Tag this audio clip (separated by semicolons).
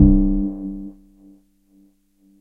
multisample; bell; reaktor